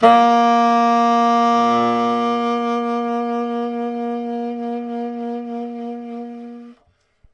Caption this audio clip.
Baritone bb3 v95
The third of the series of saxophone samples. The format is ready to use in sampletank but obviously can be imported to other samplers. The collection includes multiple articulations for a realistic performance.
woodwind, baritone-sax, jazz, sampled-instruments, vst, sax, saxophone